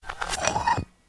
rubbing glass 6
1 of 5 sounds recording glass being rubbed together. 5 minute effort,
will probably follow this up with different selections of glass. nice
sounds.
harsh, glass, rubbing-glass, rubbing